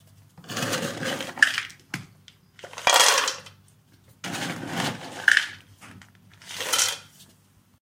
Dog food being taken out of a plastic box and poured into a smaller bowl